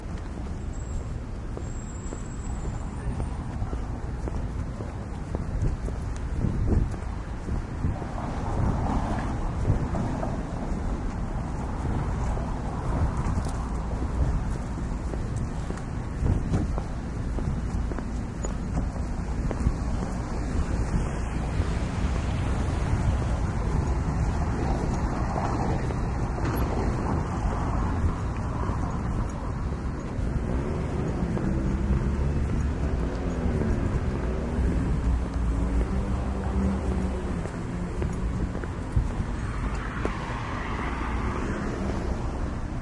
Recorded during a 12 hour work day. Walking across the street.